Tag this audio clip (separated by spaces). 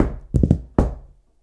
vol 0 sounds egoless stomping natural boxes